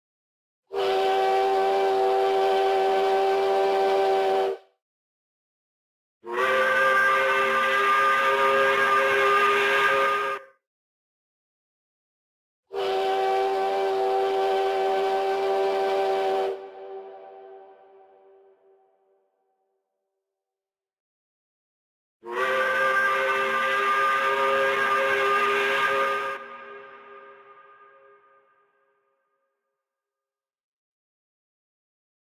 Factory whistle, train whistle
end-of-day, factory, steam-train, steam-train-whistle, train, whistle, workday, workshop